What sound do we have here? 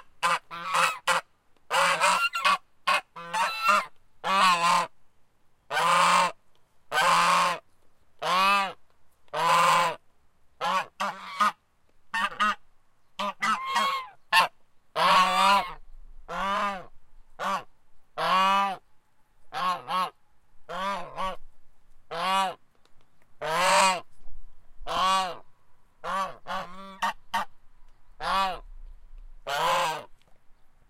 Goose, Korea, Sound
Goose, Korea, Sound